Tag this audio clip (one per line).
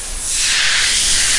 interface
mechanical
robotic
droid
robotics
automation
game
machine
space
bionic
cyborg
android
computer
intelligent
robot
alien